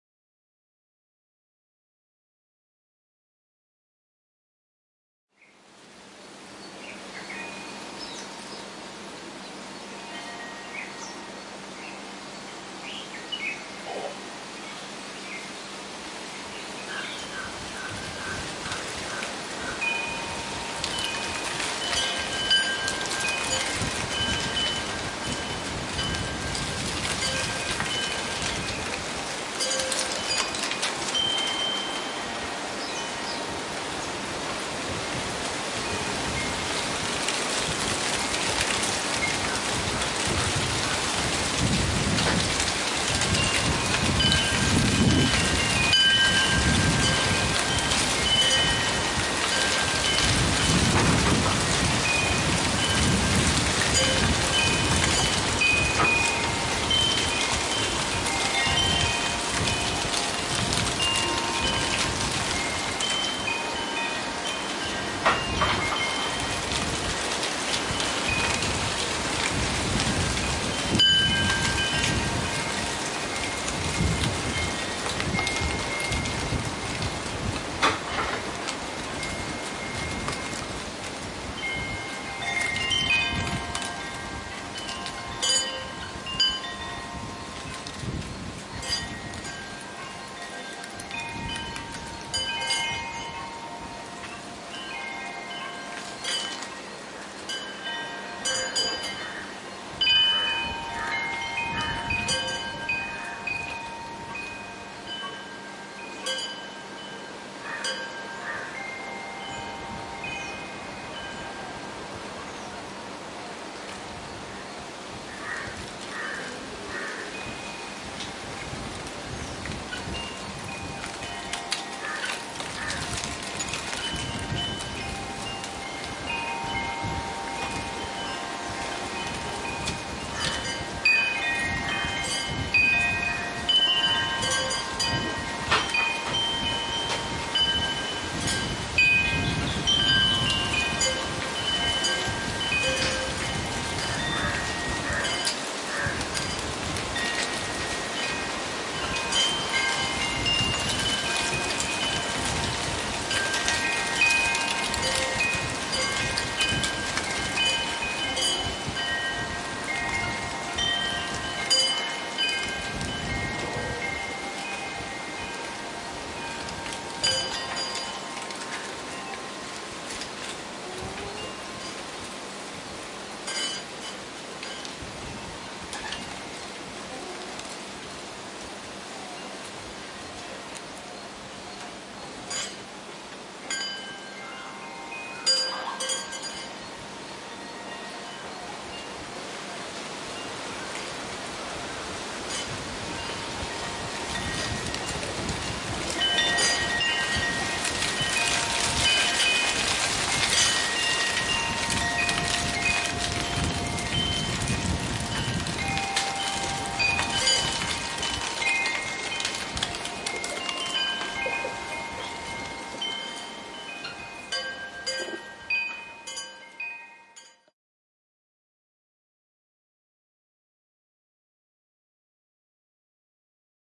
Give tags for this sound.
Farm; Field-Recording; Leaves; Wind; wind-chimes

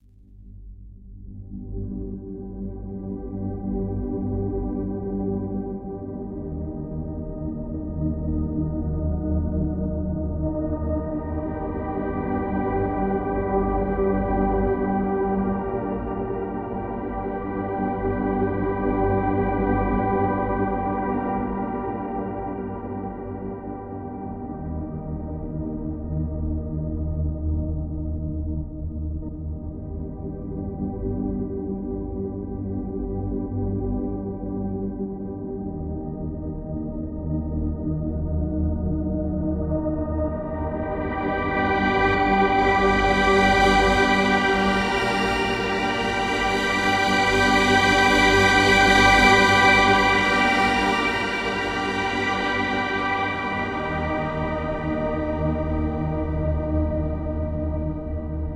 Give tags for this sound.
old-music; music; ambience; spacey; soundscape; sad; sound

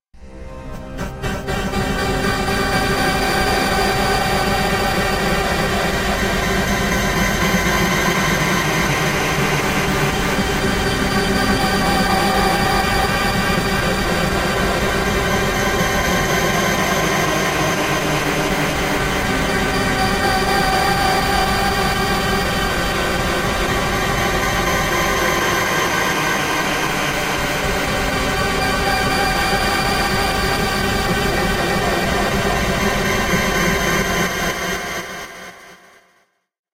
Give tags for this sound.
airy; choire; delay; granular; organ; reverb; space; spooky; stereo

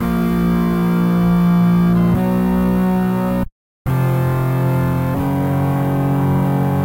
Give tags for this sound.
accordeon; guitar; guitare

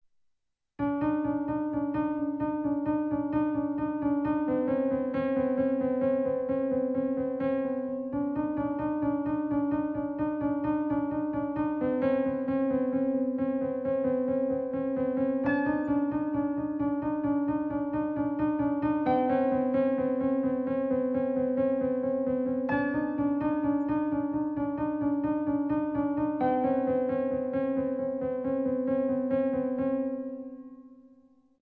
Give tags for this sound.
creepy; horror; old; piano; suspence

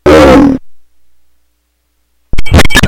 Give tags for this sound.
bending; circuit-bent; coleco; core; experimental; glitch; just-plain-mental; murderbreak; rythmic-distortion

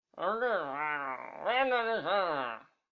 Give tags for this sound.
noise; voice; male

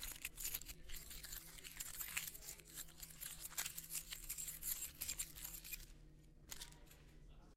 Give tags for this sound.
MTC500-M002-s13keys; ringy; scratchjinglemuddlegrainy

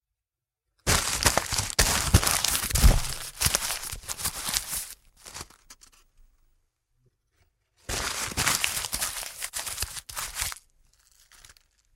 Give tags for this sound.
crushed; paper

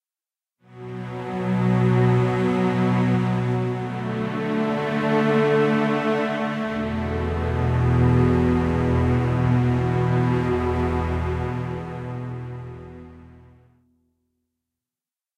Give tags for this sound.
ambience; ambient; atmosphere; background; background-sound; cinematic; dark; deep; drama; dramatic; drone; film; hollywood; horror; mood; movie; music; pad; scary; soundscape; spooky; story; strings; suspense; thrill; thriller; trailer

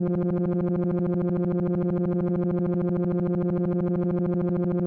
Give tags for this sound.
dialog; dialogue; text; scroll; speak; voice; speaking

